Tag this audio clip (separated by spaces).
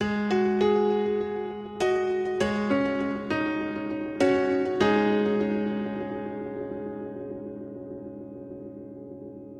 digital sample chords hit music melody video synth samples synthesizer 8-bit sounds loop drums game loops drum awesome